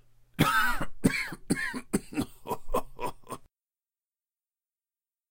Japanese stereotype coughs and laughs
Me portraying a Japanese man coughing and laughing after taking a good lungful of 'smoke'
bong, cough, Japanese, laugh, hookah, smoke